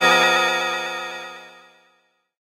PPG 008 Dissonant Space Organ E2
This sample is part of the "PPG
MULTISAMPLE 008 Dissonant Space Organ" sample pack. A short dissonant
chord with a sound that is similar to that or an organ. In the sample
pack there are 16 samples evenly spread across 5 octaves (C1 till C6).
The note in the sample name (C, E or G#) does not indicate the pitch of
the sound but the key on my keyboard. The sound was created on the PPG VSTi. After that normalising and fades where applied within Cubase SX.
ppg, organ, multisample, dissonant, chord